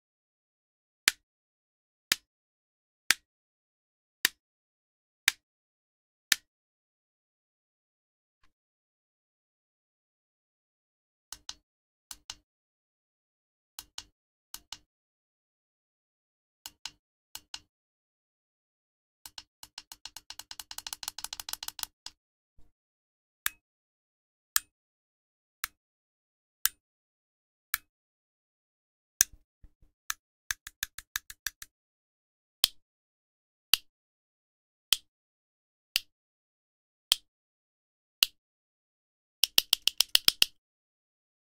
Different switches being flicked and pressed in single and multiple phases.
Button, Click, Flick, Light, Off, On, OWI, Push, Switch